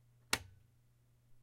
SWITCH ON 1-2
Light switch turning on
turning, switch, light